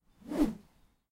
Bamboo Swing, C2
Raw audio of me swinging bamboo close to the recorder. I originally recorded these for use in a video game. The 'C' swings are much slower.
An example of how you might credit is by putting this in the description/credits:
The sound was recorded using a "H1 Zoom recorder" on 18th February 2017.
swinging, whoosh, bamboo, swish, swing, whooshing